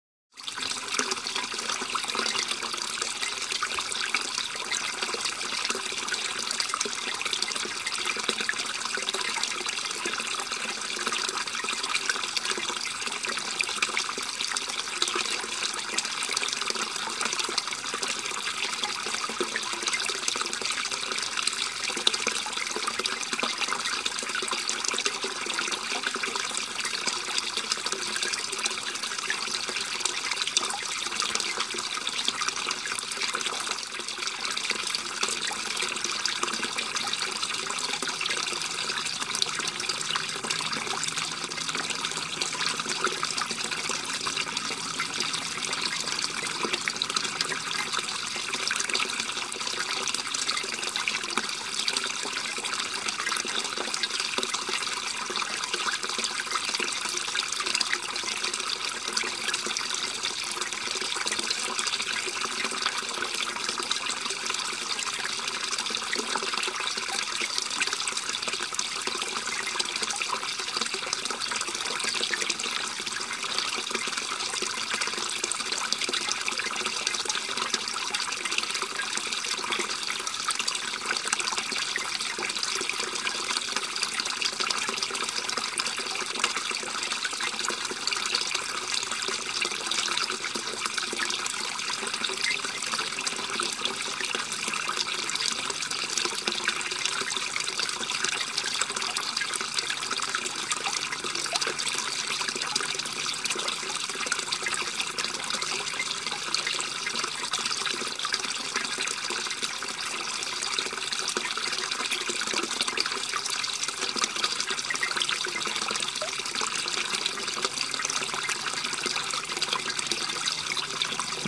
gurgling water in the mountains
gurgling water river in the mountains
air, gemericik, gurgle, mountain, river, water